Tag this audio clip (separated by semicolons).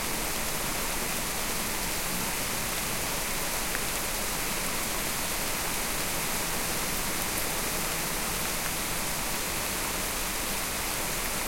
raining; weather; rainfall; steady; rain; shower; rainy